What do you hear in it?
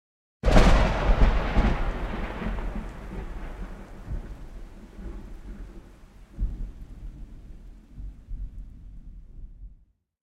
Torden og lynild 1
Heavy thunder-1, modest rain shower
filed, weather, rain, thunder, recording